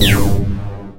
flak gun sound
White noise and sawtooth waves manipulated until they sound like a video game laser